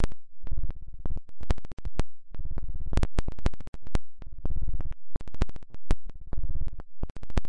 8 seconds of my own beats processed through the excellent LiveCut plug-in by smatelectronix ! Average BPM = 130
beat, crack, glitch, idm, livecut, loop, noise, processed, signal